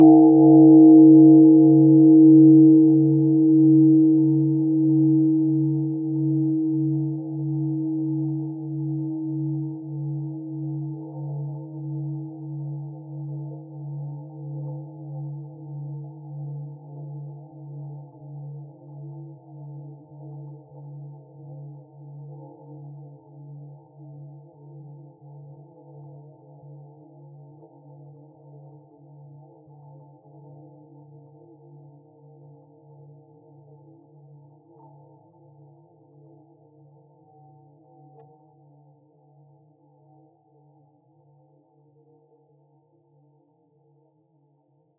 temple bell 001
Sound of a 1200 lbs. Japanese Temple Bell. Cast in 1532 and made of bronze. Recording from 2023 New Years Public bell ringing ceremony San Francisco. Long receding tone. Mono